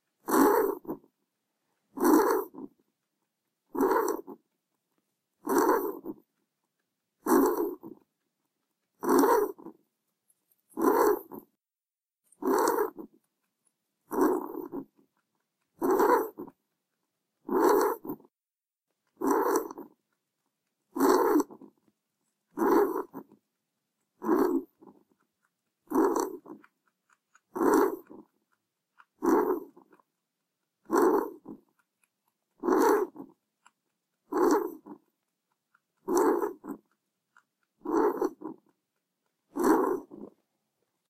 Loud bunny rabbit snoring
Courtesy of my little boy bunny, Phoenix! (He's currently fighting a sinus infection; normally you can't hear bunnies snore like this. In fact, if you DO hear your rabbit making sounds like this, you should take them to a vet ASAP.)
Recorded for the visual novelette, Francy Droo 2.
breathing, bunny, loud, rabbit, respiratory, rest, resting, sinus-infection, sleep, sleeping, snore, snoring